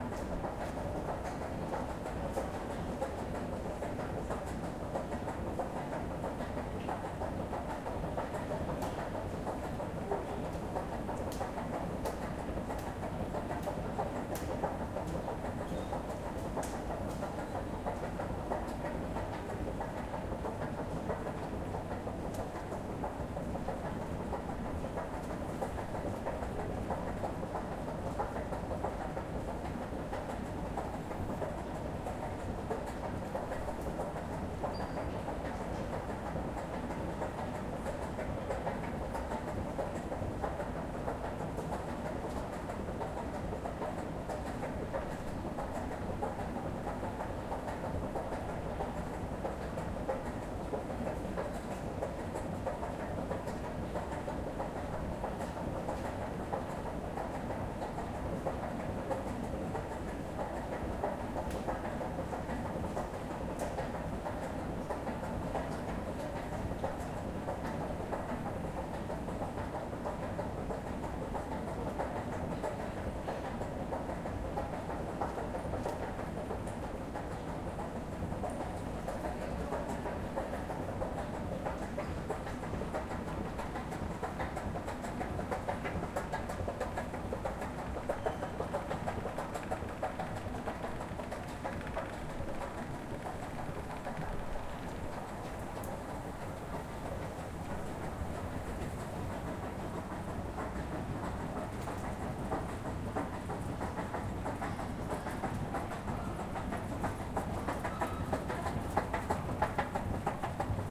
Sample recorded with ZOOM H4 in one of Parisian shopping centers.
ambience, escalator, field, paris, recording, stereo